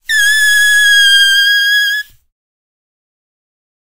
Bicycle Pump - Metal - Squeak 03
A bicycle pump recorded with a Zoom H6 and a Beyerdynamic MC740.
Gas, Metal, Pressure, Pump, Squeak, Valve